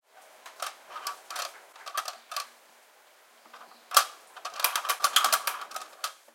Plastic Shutter
This was simply a recording of my plastic air vent rattling in the kitchen. I cleaned up the recoding using Pro Tools. Enjoy :)